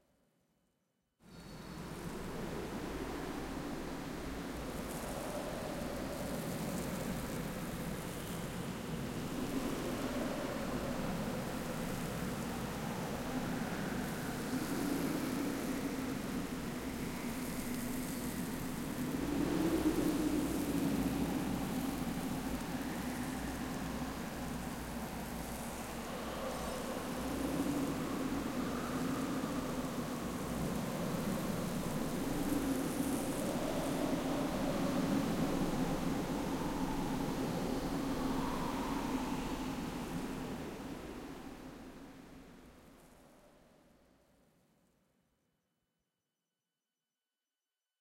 A simple desert sound with wind and sand grains.